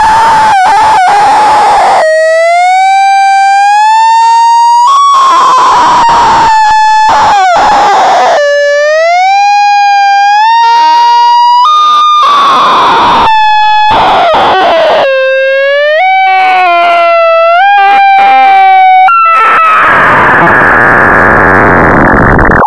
synth screams with chaotic behavior, sounds like woman's ahhh..
made from 2 sine oscillator frequency modulating each other and some variable controls.
programmed in ChucK programming language.
sine, chaos, programming, sci-fi, chuck